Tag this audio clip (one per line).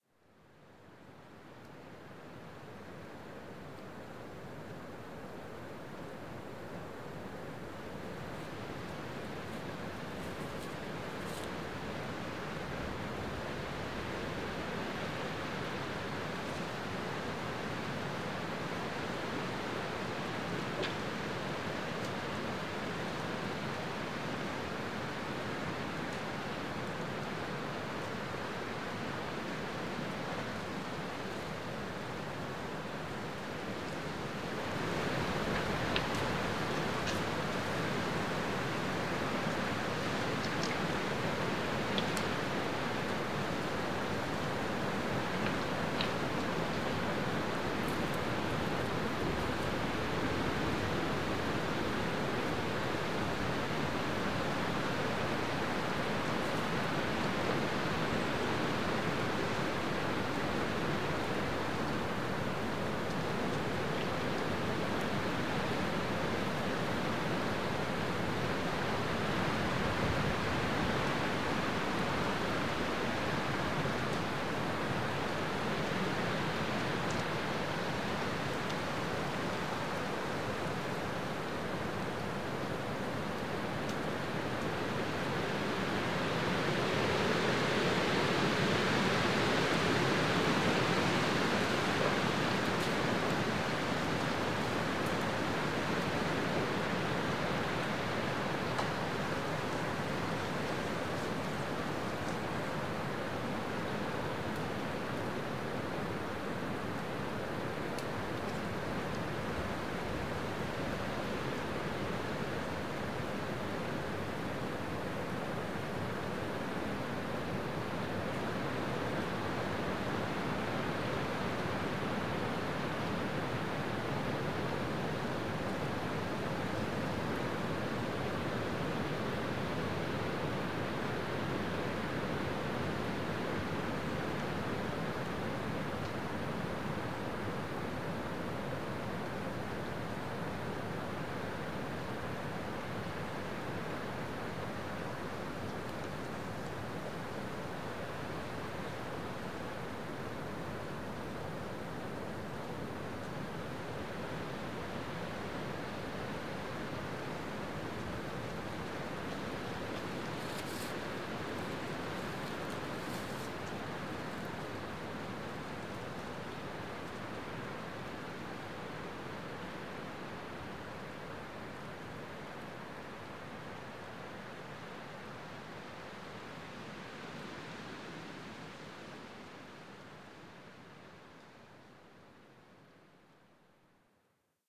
breeze
field-recording
forest
nature
peaceful
serene
spring
wind
woods